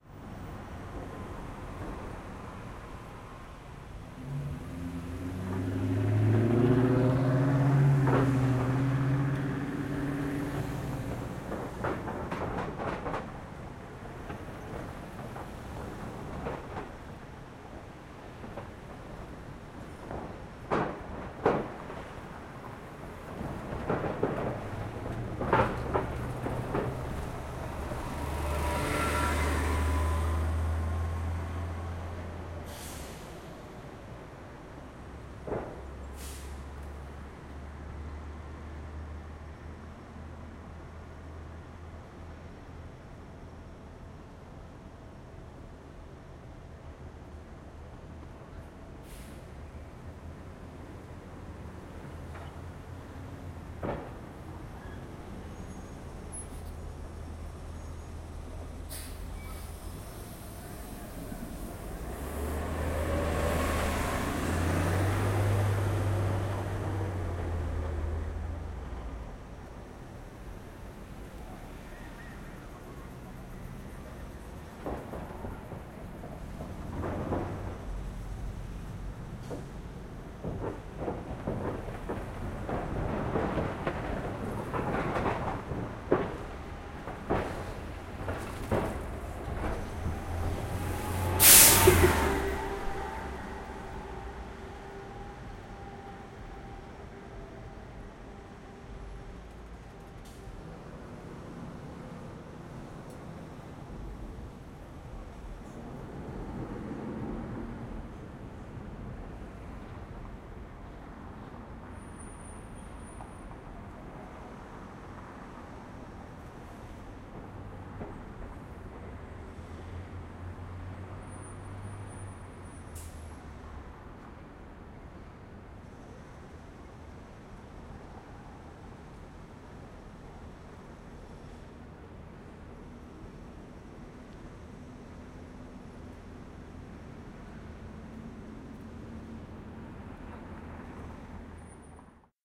LA Streets 6th and Broadway Morning 2-EDIT
Recorded in Los Angeles, Fall 2019.
Light traffic. Metal plates at intersection. Buses.
AudioDramaHub, City, field-recording, Los-Angeles, Street, traffic